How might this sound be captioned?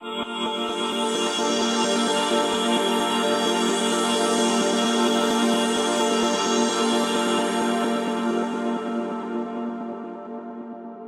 A luscious pad/atmosphere perfect for use in soundtrack/scoring, chillwave, liquid funk, dnb, house/progressive, breakbeats, trance, rnb, indie, synthpop, electro, ambient, IDM, downtempo etc.
soundscape, long, wide, progressive, atmosphere, liquid, dreamy, luscious, 130-bpm, evolving, pad, effects, 130, expansive, ambience, reverb, morphing, melodic, house